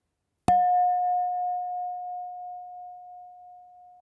Wine glasses crashing
crashing, glasses, Wine